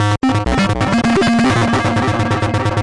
These short noise loops were made with a free buggy TB-303 emulator VST.